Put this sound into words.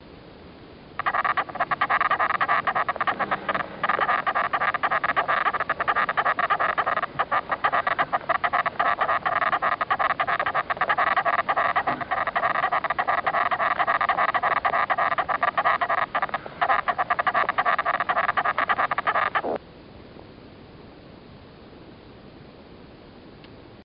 ANT SOUND
sound emitted by a single major worker ant.
Recorded In Mali Along Saharan track "Bidon 5" between Gao and Bordi Moktar. Winter 2011
Ant, Sahara